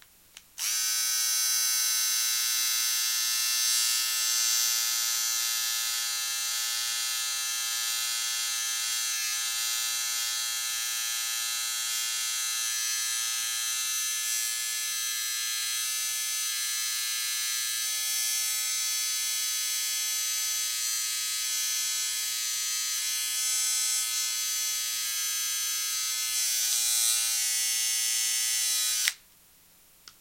The sound of a person getting a tattoo